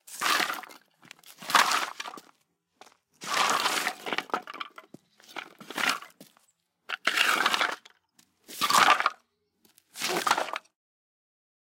SFX wood stone floor scraping pile 01
SFX, wood, fall, pile, bunch, stone floor, drop, falling, hit, impact, scraping
scraping, wood, stone, drop, hit, SFX, pile, falling, floor, bunch, impact, fall